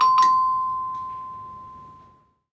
Bell Department Store Dbl+6M
Common Department Store sound.
bell, common, department, requests, store